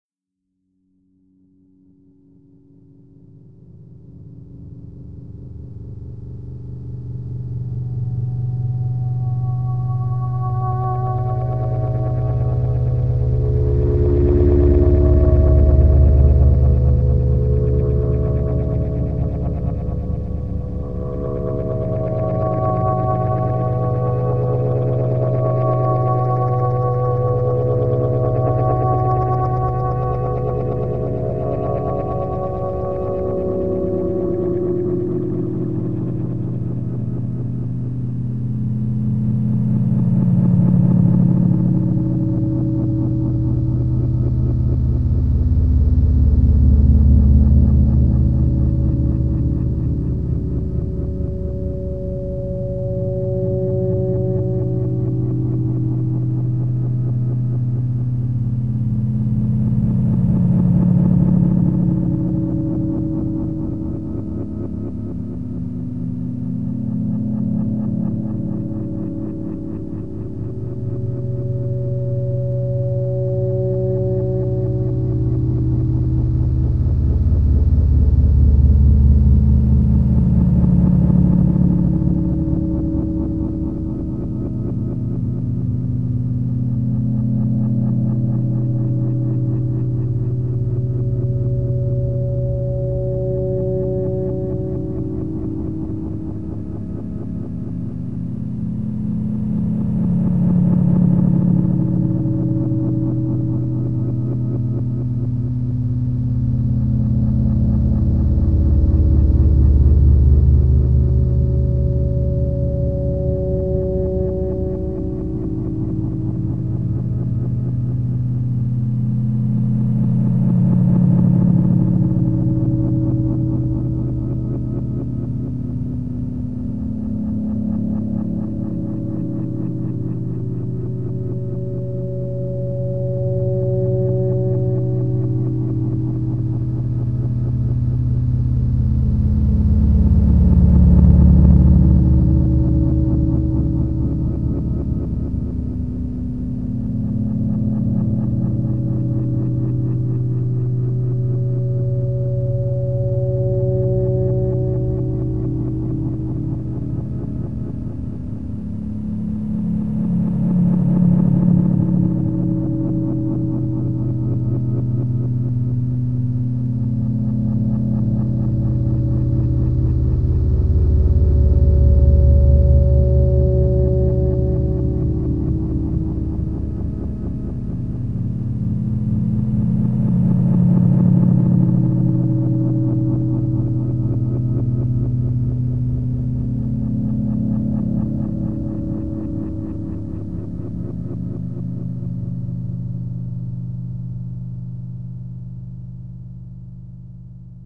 18 Long Sample 2
A harmonica, layered/looped/effected at various speeds.